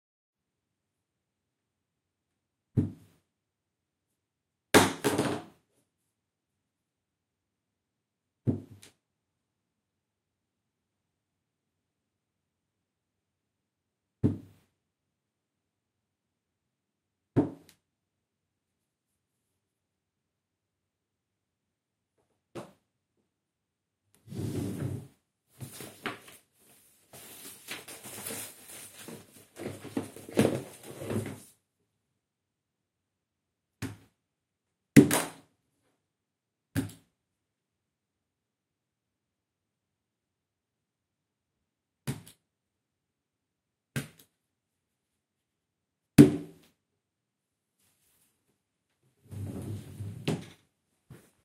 Objects Falls Table
Many sounds of objects falling in a table. Open a drawer and looking for papers. Binaural sensation. If you like it or it helps you in some ways, please give me stars :)